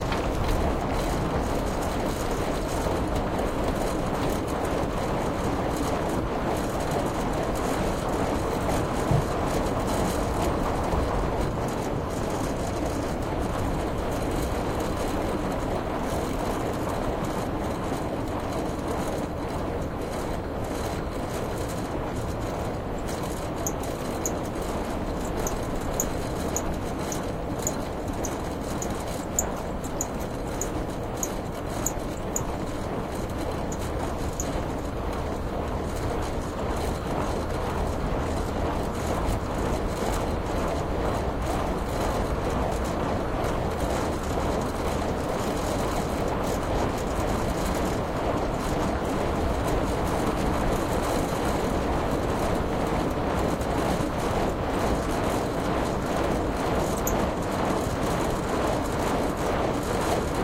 A small rusty electric windmill turning rapidly in the breeze with lots of squeaking and rattling.
Breeze, Electric, Machinery, Mechanical, Metal, Motor, Outdoors, Rattle, Squeaking